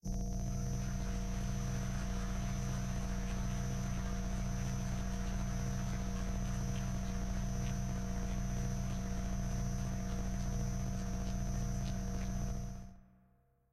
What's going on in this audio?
sci-fi drone